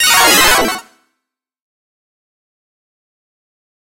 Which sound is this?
Retro Game Sounds SFX 140
pickup, effect, fx, gameover, sounddesign, sfx, gun, electric, freaky, shoot, sci-fi, retrogame, weapon, sound, soundeffect